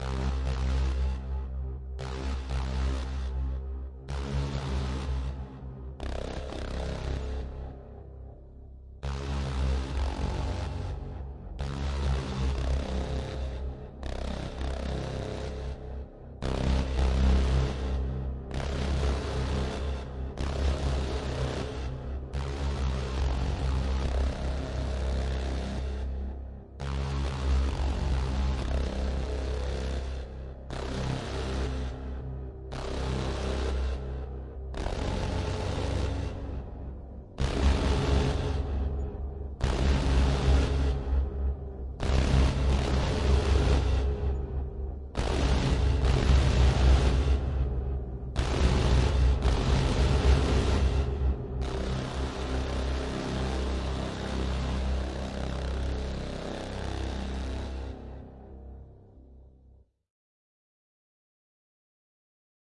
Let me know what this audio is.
Used all four oscillators in Ableton's Operator in addition to a lot of other effects to create the teeth-grinding feeling of rage.